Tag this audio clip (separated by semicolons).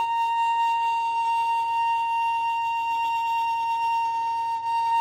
pitched; high; note; sustain; long; violin; shrill; squeak